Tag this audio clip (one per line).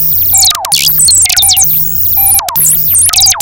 analog
arp
arp2600
electronic
hardware
noise
sound
synth